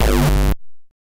distressed BD 01
A bass drum processed through a Nord Modular synth.
bass, distortion, drum, foot, hard, kick, nord